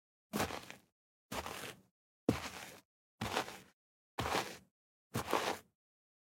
Footsteps 6 Dirt shoe
6 Mono Footsteps on gravel/dirt. Recording with RME UCX / NT55.
step, ground, crunch, footstep, steps, effects, dirt, feet, footsteps, gravel, floor, foley, walking, walk, foot